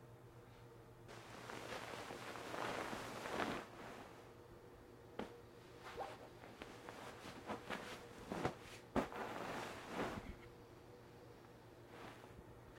Bed Foley
This is the sound of a person moving around while sleeping.
bed, blankets, covers, sheets